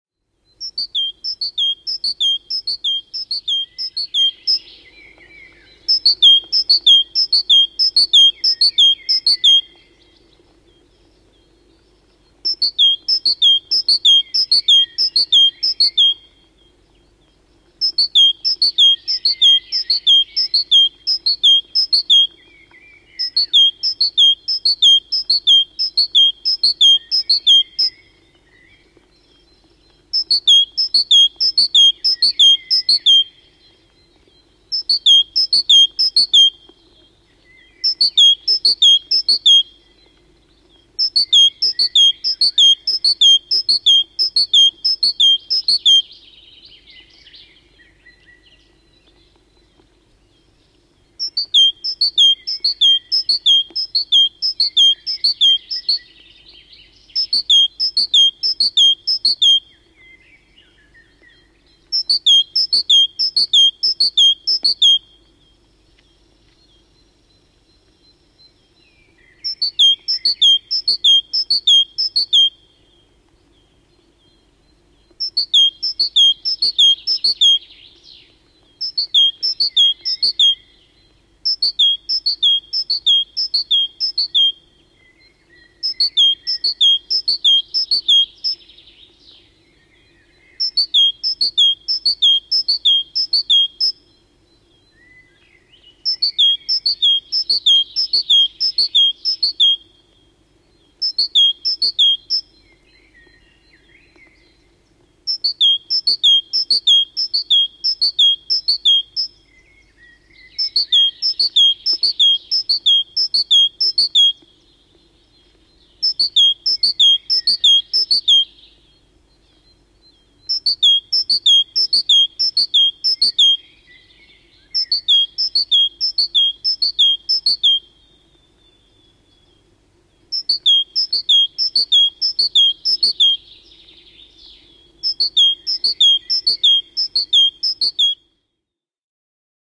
Talitiainen, laulu / A great tit singing a three-part verse of the 1960s, some other birds in the bg
Talitiaisen kolmitavuinen ti-ti-tyy 1960-luvulla. Taustalla vähän muita lintuja.
Paikka/Place: Suomi / Finland / Vesivehmaa
Aika/Date: 1963